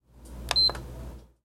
Power on
turning on power for PS5
power, recording